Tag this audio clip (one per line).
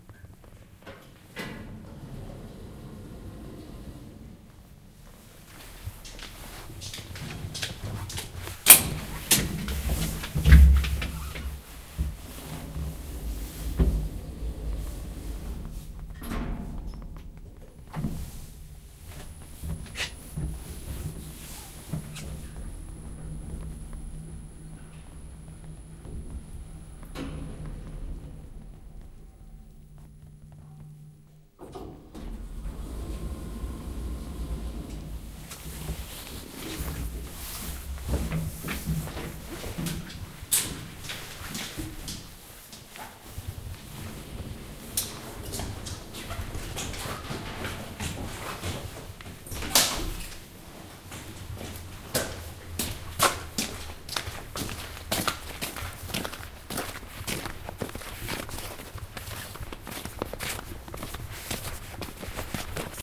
elevator
door
field-recording
closes
opens
interior